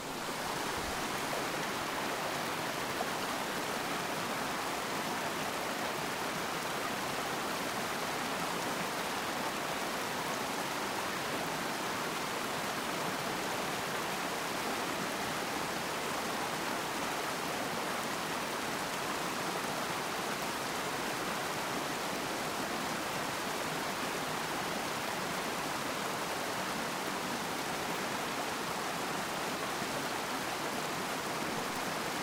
Recording of rapids/running water.